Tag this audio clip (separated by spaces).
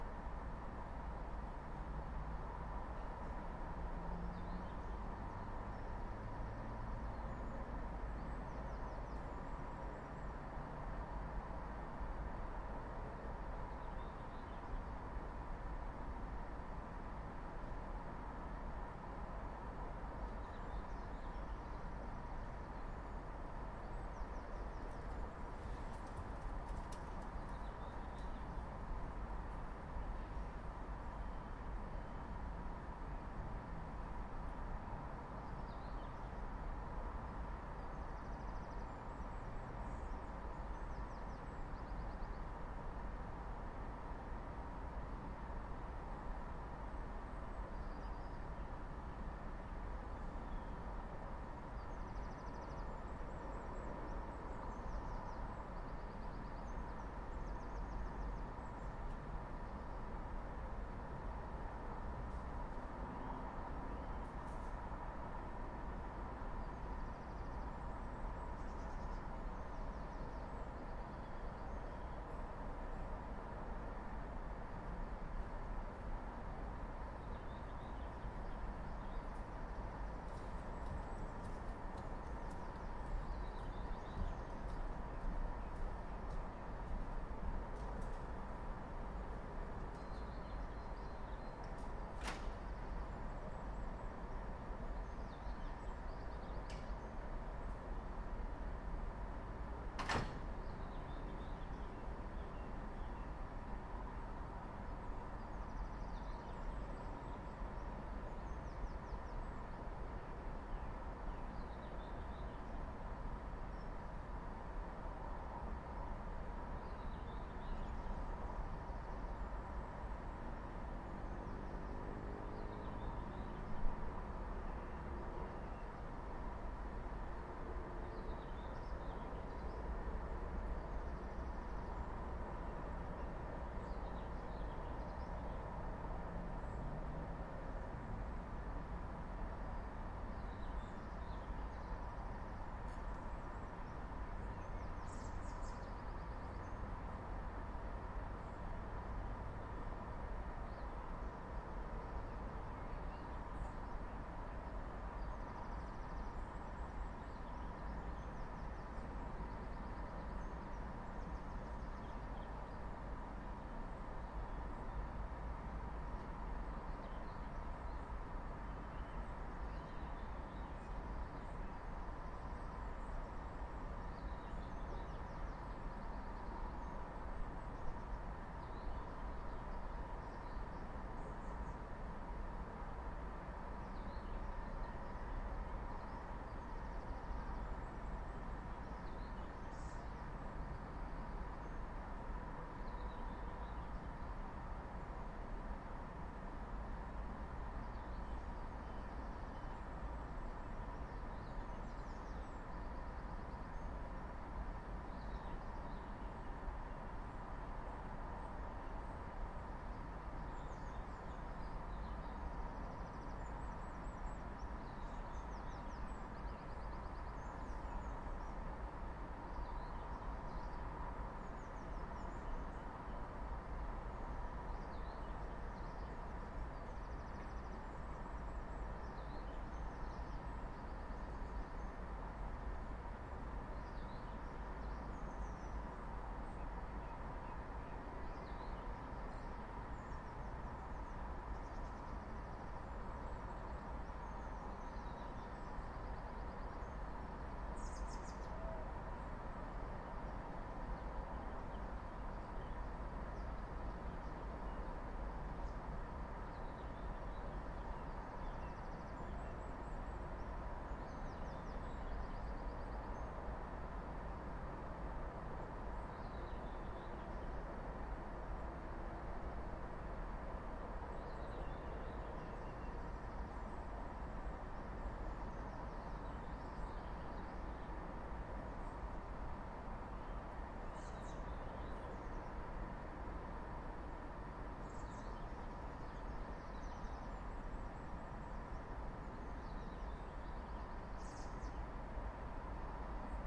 building office people Quiet